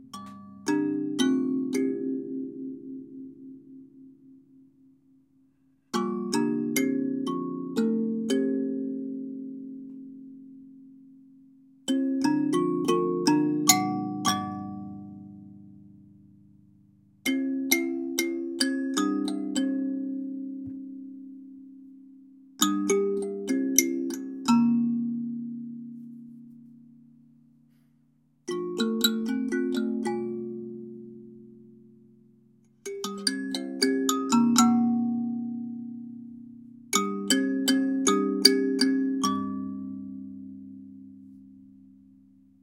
Phrases sampled from a thumb piano on loan, with a close field Sony D50.
d50, instrumental, kalimba, loop, phrase, sample-pack, stereo, thumb-piano